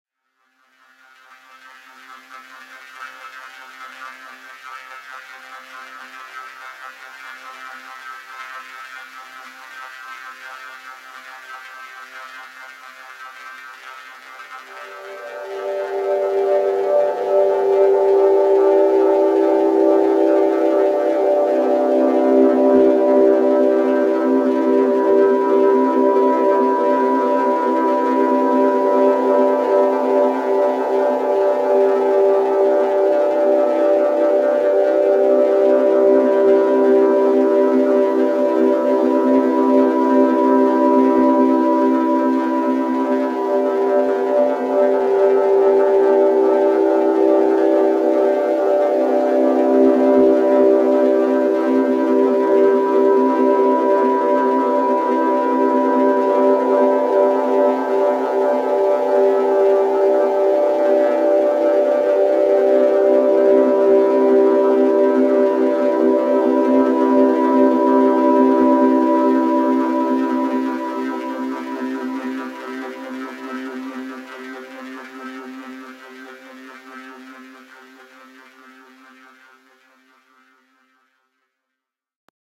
FairyDrops A 140BPM
I make this ambiance with a sample and native plugins of ableton.
Enjoy!
Aerian, Ambiance, Cinematic, Space